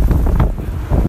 wind windy storm
windy, wind, storm